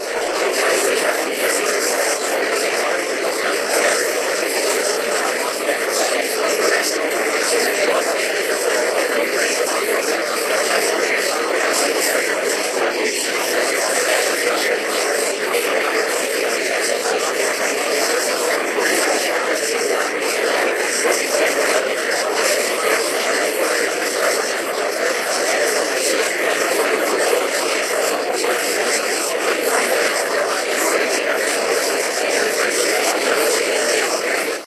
Broken Computer\ Voices\ Ambient Alien Atmosphere
Cool creepy sound effect for a game. I also added a few distortion effects.
AlienAtmosphere, BrokenComputer, ErieVoices